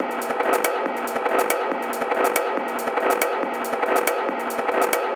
Space Tunnel 7
beat, dance, electronica, loop, processed